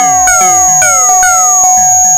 110 bpm FM Rhythm -17
A rhythmic loop created with an ensemble from the Reaktor
User Library. This loop has a nice electro feel and the typical higher
frequency bell like content of frequency modulation. An experimental
loop that's a little overdriven. The tempo is 110 bpm and it lasts 1 measure 4/4. Mastered within Cubase SX and Wavelab using several plugins.